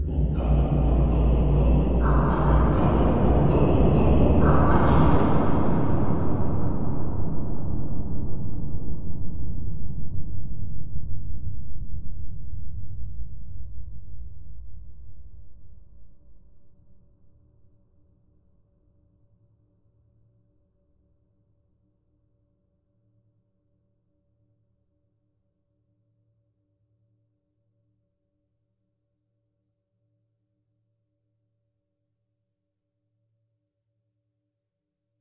Cave Tribal song (Cinematic)
Vocal recorded Yellofier, Cave background sound Iphone + Rode mic
Edited: Adobe + FXs
background-sound, phantom, Cave, film, terror, reverb, atmos, background, atmosphere, dramatic, cinematic, loop, song, dance, drama, ambient, Tribal, singer, ambience